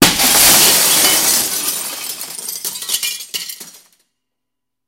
Windows being broken with various objects. Also includes scratching.